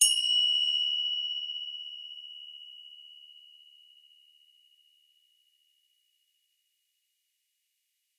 Meditative Tingsha cymbal.
Zoom H4n 16 Bit 44.1Hz